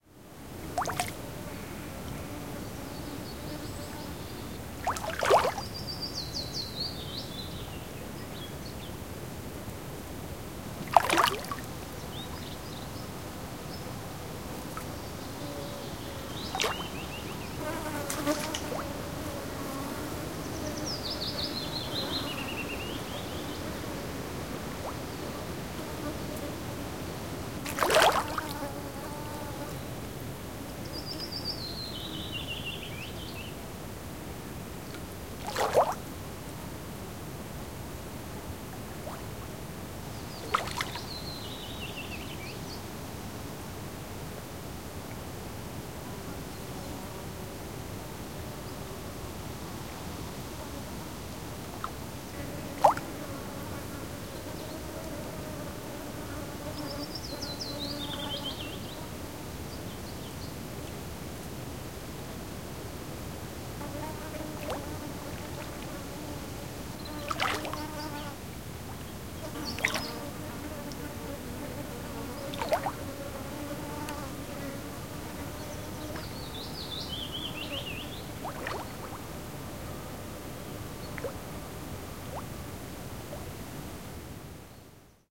Järvenranta toukokuussa, hiljaista, pikkukalat pulahtavat tyynen veden pinnassa. Hyönteisiä ja taustalla vähän pikkulintuja. Metsän kohinaa. Kevät, kesä.
Äänitetty / Rec: Zoom H2, internal mic
Paikka/Place: Suomi / Finland / Sysmä, Soiniemi
Aika/Date: 26.05.2012